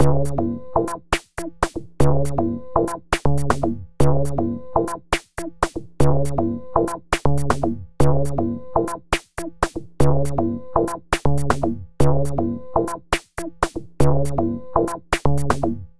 weird electronic soundingDrum loop processed with an octave effect i made in DB-audiowares 'Quantum Fx' created by me, Number at end indicates tempo